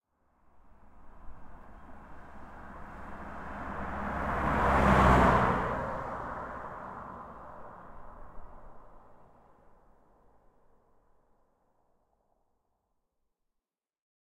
Car pass by high speed 1
Car pass by at high speed